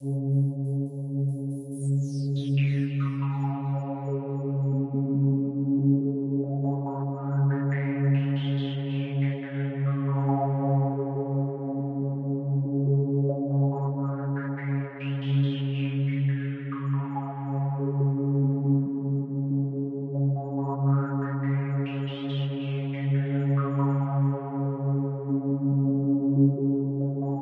just an odd melodic noise.
140, 160, ambient, bpm, electonic, hard, hosue, melody, rave, rock, techno, trance